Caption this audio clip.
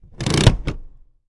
sons cotxe seient 3 2011-10-19
car, field-recording, sound